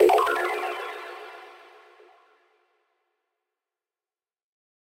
Small power-on notification sound.